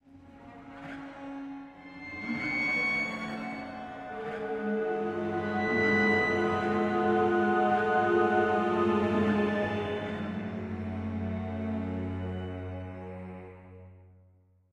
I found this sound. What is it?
A 14 second fragment of scary music. It could be used to do a soundtrack.